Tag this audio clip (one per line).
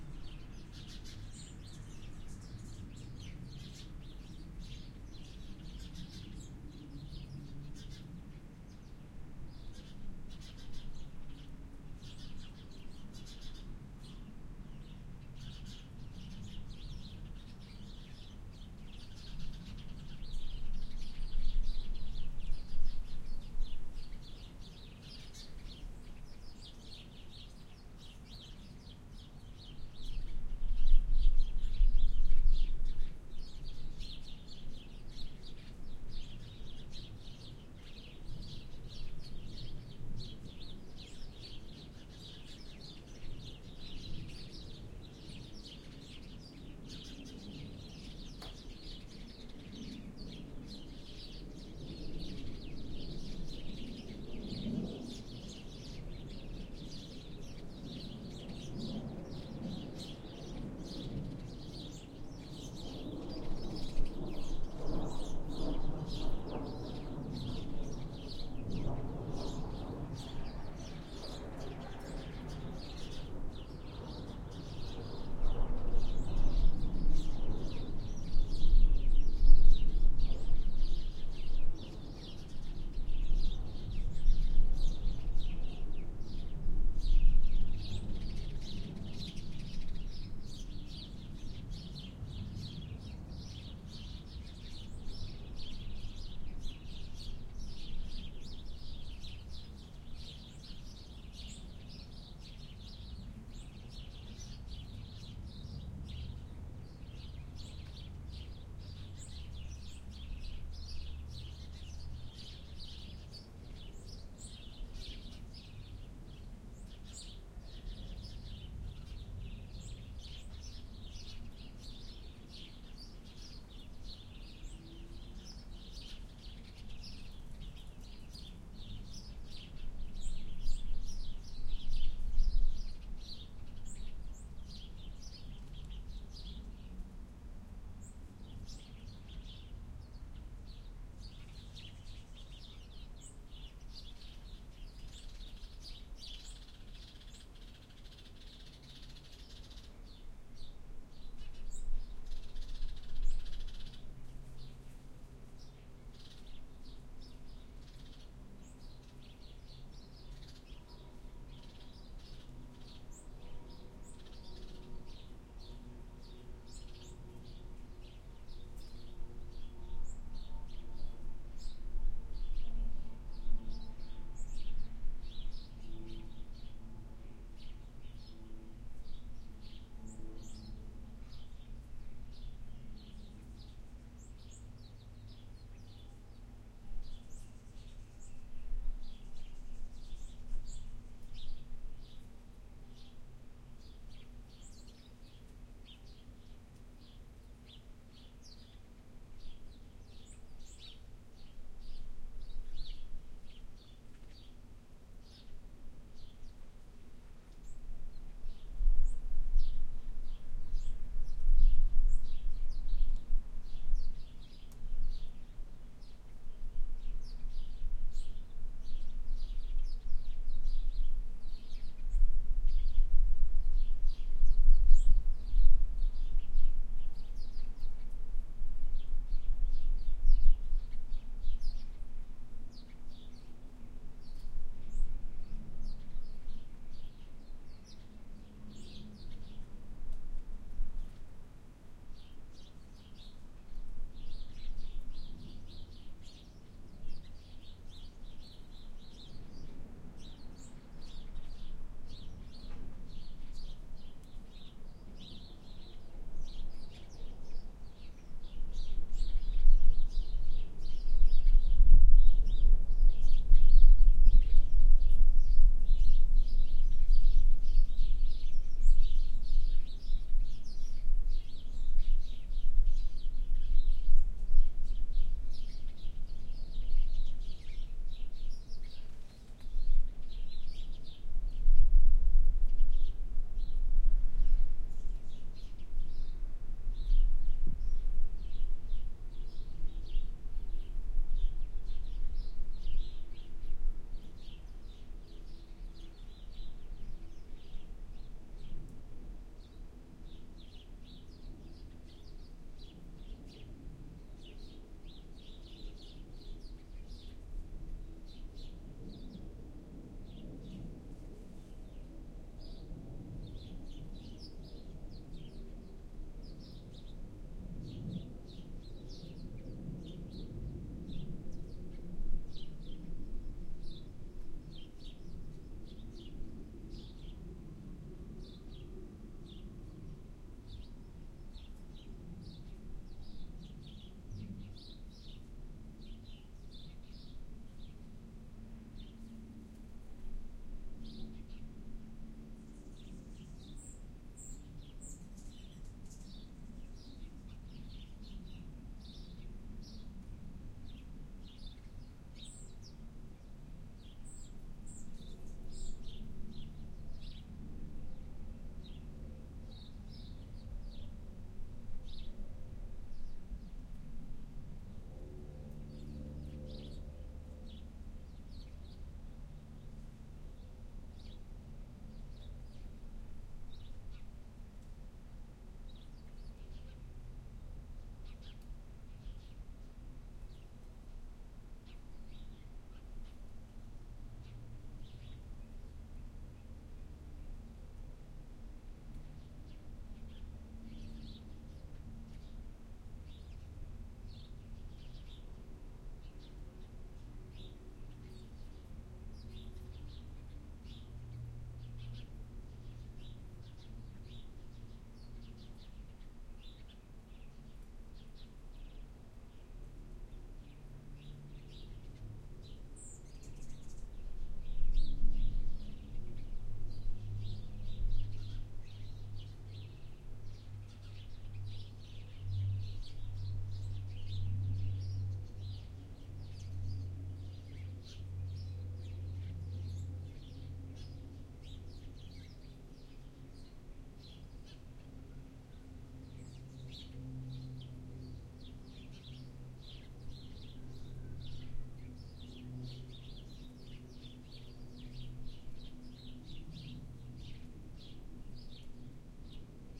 ambient-sound
backyard
birds
cheerful
day
design
effects
field-recording
park
sound
summer
sunny